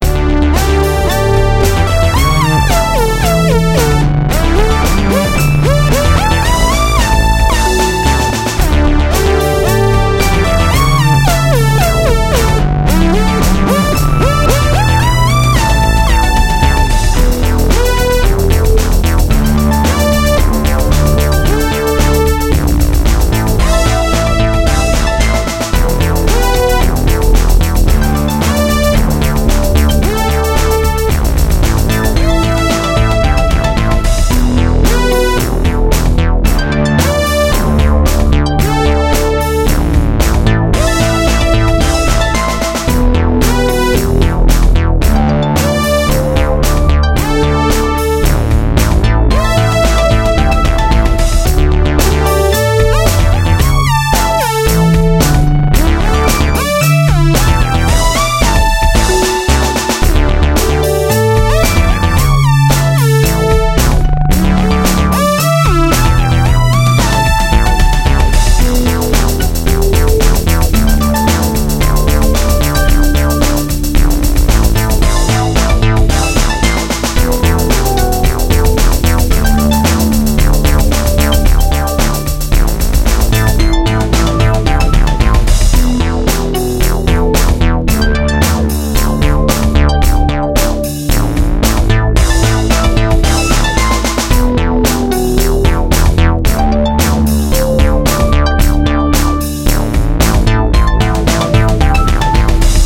Original music at 112 BPM Key of D. Composed while thinking only pure thoughts.
BPM, Drums, Synth, loop, Electro, 112, Music, Bass